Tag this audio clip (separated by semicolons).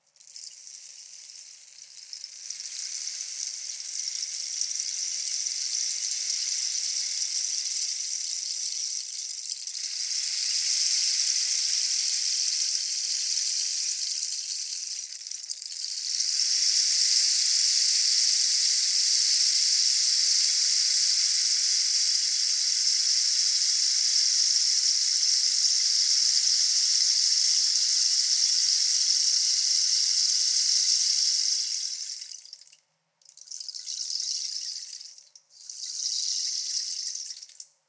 clack; rustle; cactus; percussion; click; instrument; rain; rain-maker